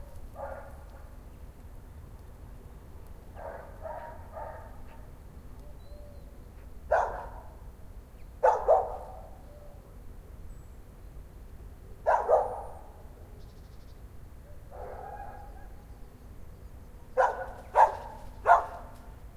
Two dogs barking.
ambience, bark, barking, birds, Dog, field-recording